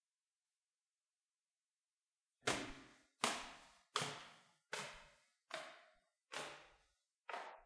STAIRS: This is the sound of a person climbing stairs, step is slowing down to get to your destination you can also see that between the sole and the pavement is no grit.
I used ZOOM H4 HANDY RECORDER with built- in microphones.
I modified the original sound and added equalized and compression.